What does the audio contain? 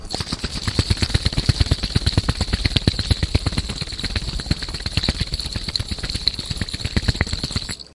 water shaking fast2
Shaking glass water bottle. Sound recorded using mono microphone and ensemble. Edited in Logic Pro to speed up sound using time and pitch machine.